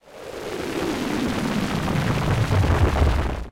water boyling
the synthetic water boiling sound effect
boil, boiling, bubble, bubbles, bubbling, bubbly, effect, free, hot, kettle, kitchen, sound, sound-design, synthetic, water